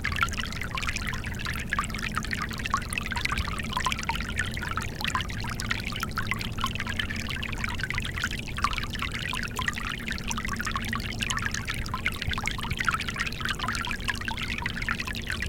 a running stream